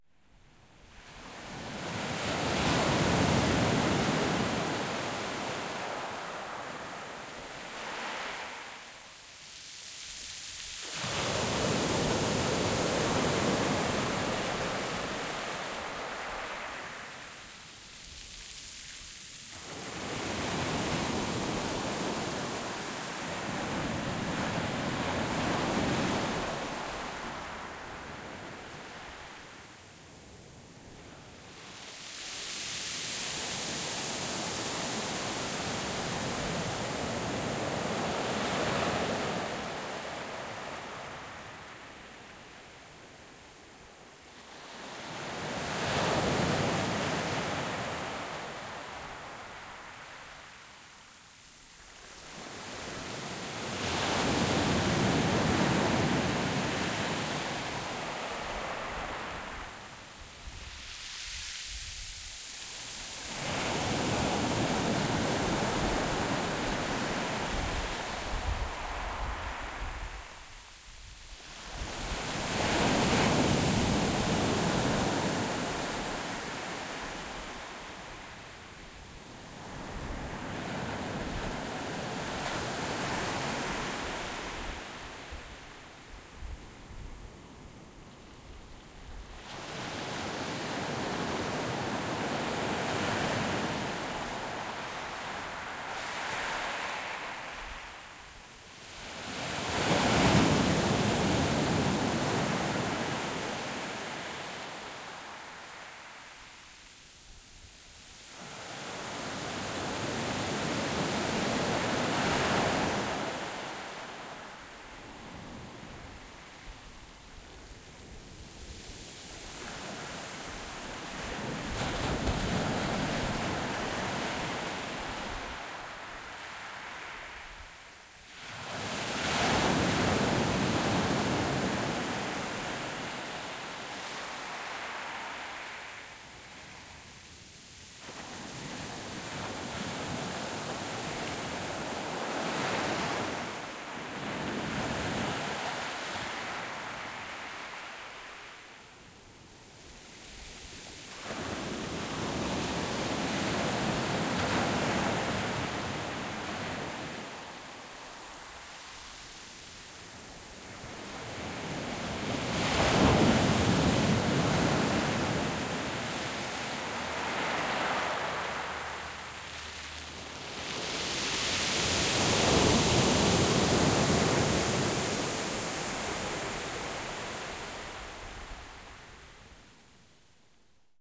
Beach, Pebble
Waves (moderate) against pebble beach.
Stereo XY-coincident pair @44k1Hz recording.